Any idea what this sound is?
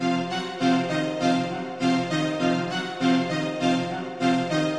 strings loop 8 100bpm CPK
Marching dark synth line
arp, dark, strings